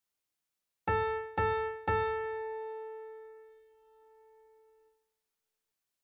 A Piano Sample
a, sample